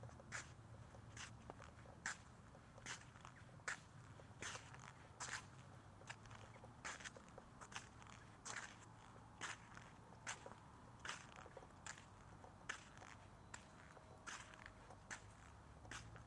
Walking in dirt (Ambient,omni)
earth, elements, omnidirectional, school-project